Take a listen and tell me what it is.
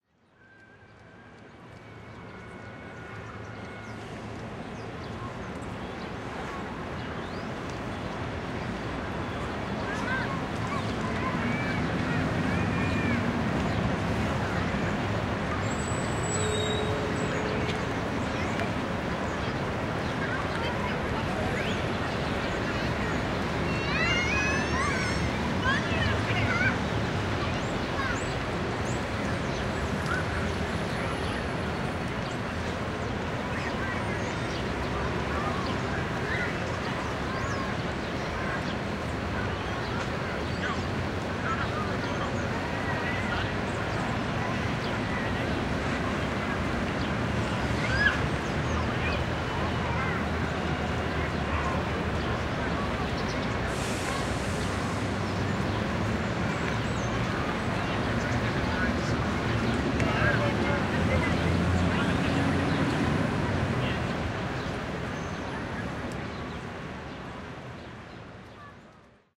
The center of the modern urban live of Barcelona. Its soundscape is a very “harmonious” combination of traffic sounds, birds singing and people talking. This square is one of the central points of the traffic of the city. Cars, buses, Auto van’s and so run by the square, taking out its explosions, purrs, screeching… all of them mixed with the sounds of the children playing into the square, or the young crew muzzing ones to others. Birds, as if all of this were normal to them, play their songs, putting their point to this soundscape so strange and harmonic composed with elements that look mutually exclusive.